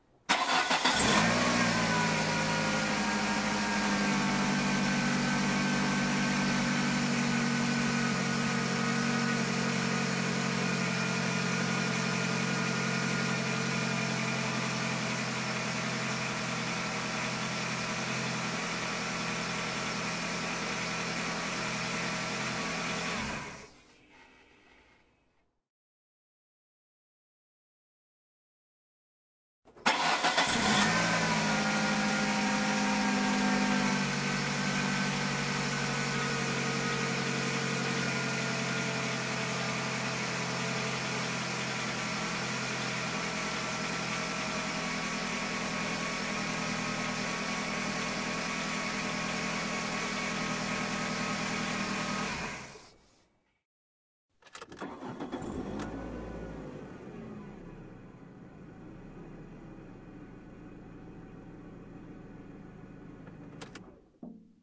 Car Ignition and Idle
Just turning over the engine, letting it run for a moment, then switching off.
ignition, start